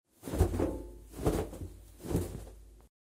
Throwing a towel three times
towel, throw, throwing